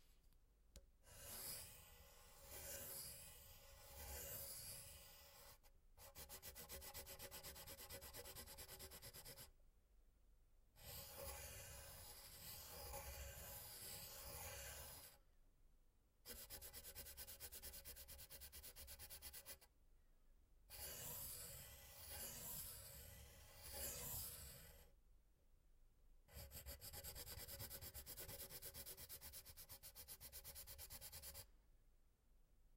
soft drawing
Drawing or doodling randomly on a paper with a soft tipped pen
pencil, drawing